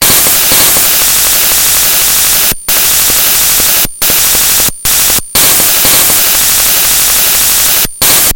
these are from LSDJ V 3.6 Compliments of a friend in Scotland.
Song 1 - 130 BPM
Song 2 - 110
Song 3 - 140
Take them and EnjoI the rush~!
gameboy, melodies, construction, nanoloop, littlesounddestructionjockey, lsdj, drums